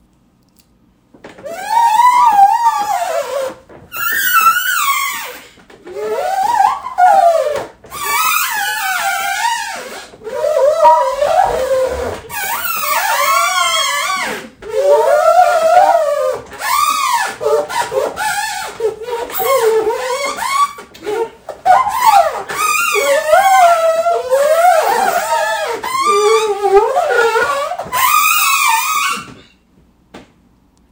rubbing fingers on waxed table
Recorded on iPhone 12 table painted with chalk paint and coated with wax
strange, noise, rub, fingers, abstract, sound, weird, freaky, squeal